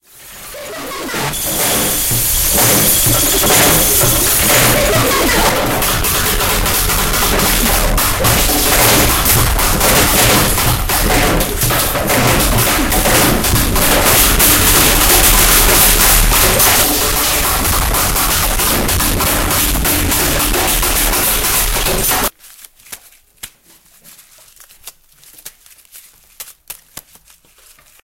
Soundscape LGFR Kylian oscar
French students from
Léon Grimault school, Rennes used MySounds from Germans students at the Berlin Metropolitan school to create this composition intituled " No Title ".